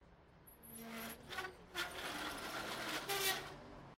Rolling shutters down
Rolling shutters of the balcony down
balcony, down, iekdelta, rolling, shutters